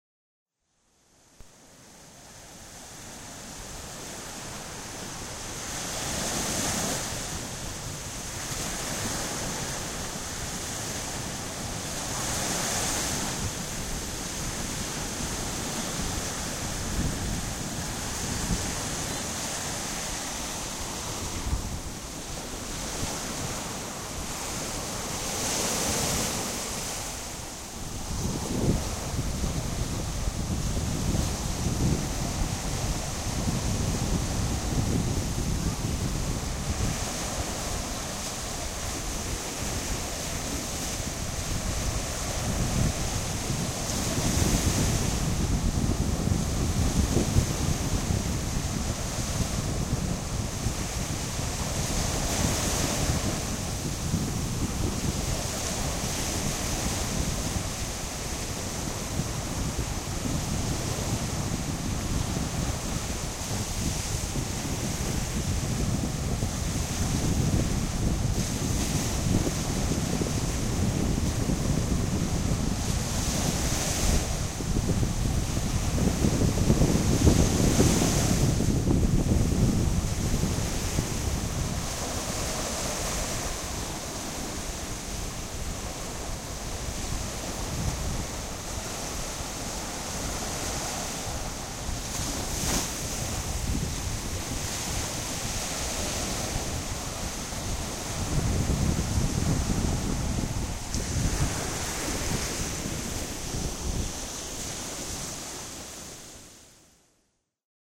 Playa del Carmen ocean waves washing up on the beach
The sound of wind and waves hitting the shoreline at Playa del Carmen, Mexico.